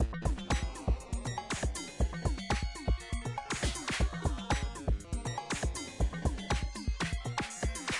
Part two of the Acidized Beat/arpy bass combo